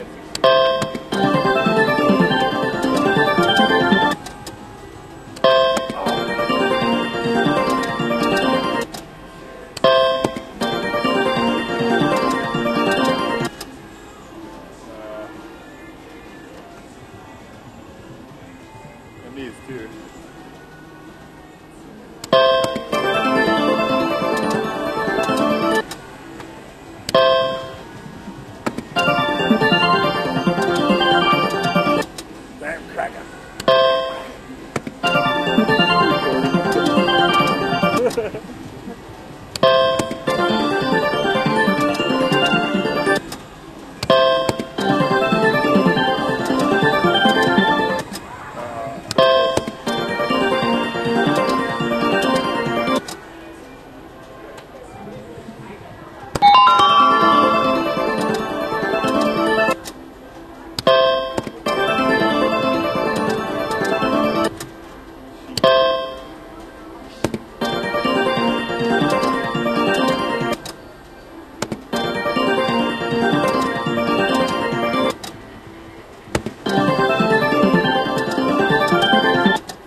WOF slots6
Casino background noises, slot machine noises, clicking, players laughing and making comments, Wheel of fortune bell,
money, field-recording, spinning-wheel, slot, clicking, machine, jack-pot, casino